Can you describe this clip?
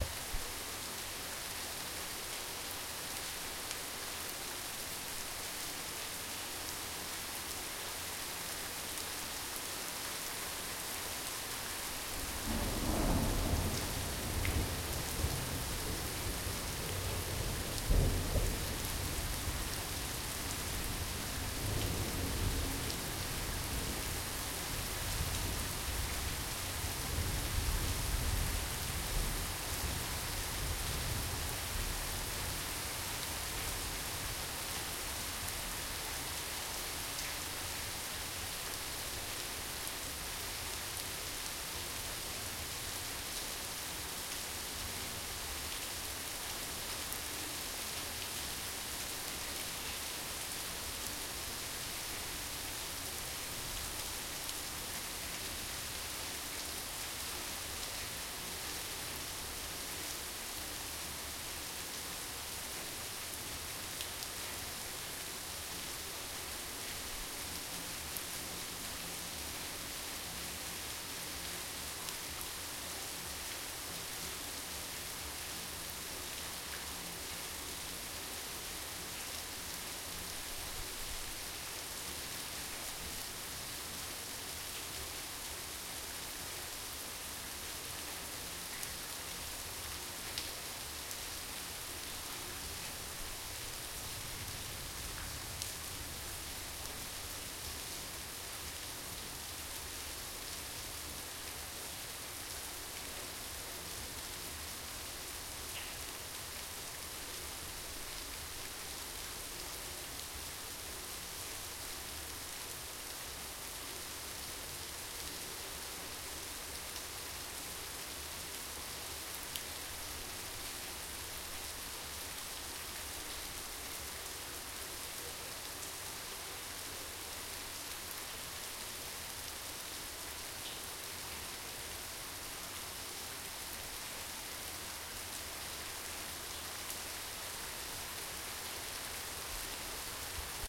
Zoom H1. Big storm and heavy rain outside my room in Dubrovnik.